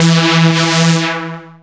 made in fl studio a long time ago
Sound, Synth, synthetic